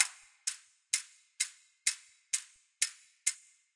Clock 128 bpm half speed
128, clock, tic-tac, tick, ticking, time, timepiece